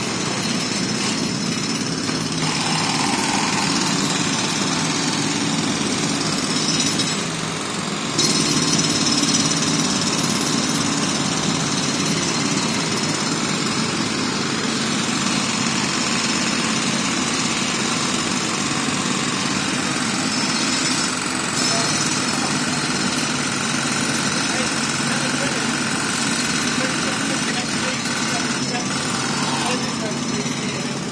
Night time roadworks
This was just outside the hotel I was sleeping in in Sheffield.
Council workers digging up a major roundabout in Sheffield city centre.
It was a curious moment coming across such a hive of activity late at night.
digger, pneumatic-drill, roadworks, Sheffield